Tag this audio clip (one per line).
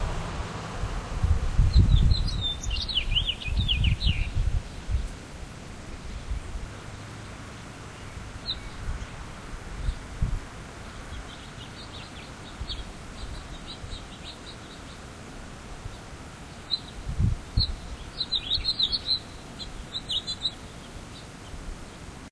bird
finch